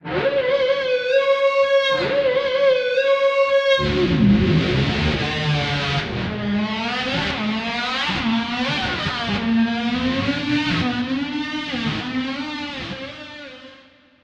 An electric guitar imitating the sound of a Harley motorcycle going off into the distance.
Bar, Distorted, Electric, Guitar, Metal, Music, Riff, Whammy